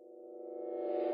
Rhymtic metal clanging reverse reverb
clangers, Field-recording